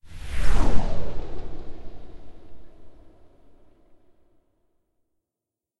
Day 12 12th July Robinhood76 Enhanced Flyby
Day 12. Super fast high quality flyby sound, possibly a UFO?
This is a part of the 50 users, 50 days series I am running until 19th August- read all about it here.